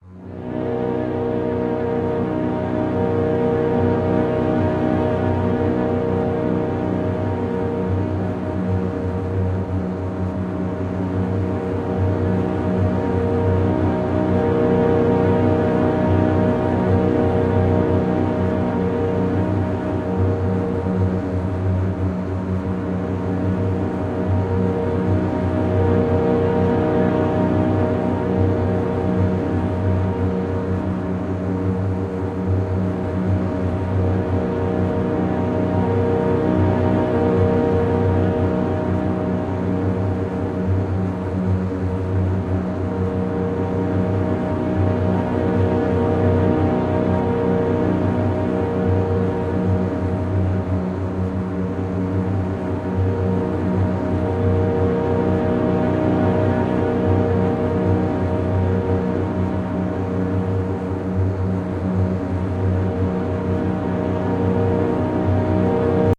Angry Drone 3
An ambient track that sounds a bit angry. Can be cut down to fit whatever length needed, and is simple enough that a looping point could likely be found fairly easily if needed longer.